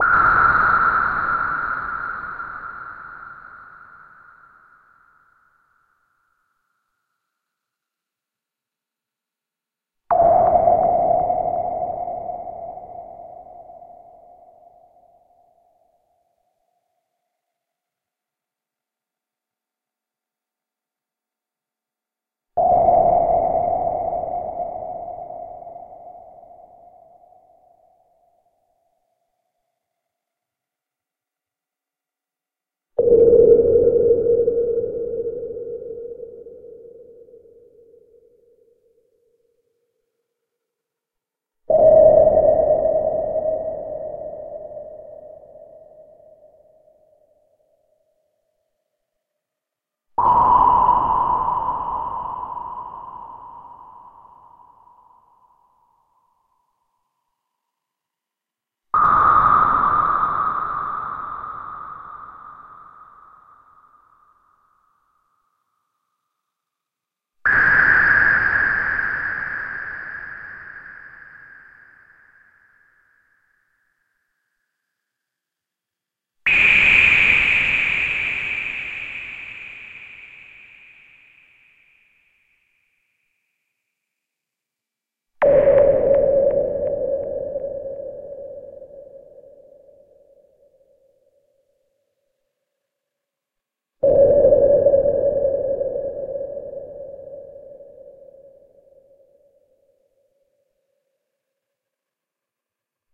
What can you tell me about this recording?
Sonar pings assorted
A series of sonar pings at various pitches
ping, sonar, synth